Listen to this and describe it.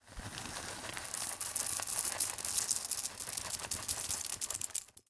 Pouring nuts into glass bowl.